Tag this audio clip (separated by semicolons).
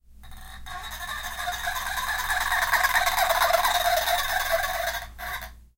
stereo; toy